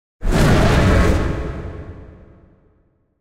Dinosaur Roar
animal, creature, dinosaur, monster, roar, scream, sound-design, sound-effect